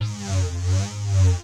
sound of my yamaha CS40M analogue
analogique, fx, sample, sound, synthesiser